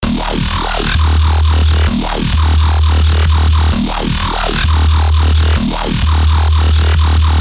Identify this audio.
becop bass 2
Part of my becope track, small parts, unused parts, edited and unedited parts.
A bassline made in fl studio and serum.
A grinding and talking bassline at 1/4 with low a long bassline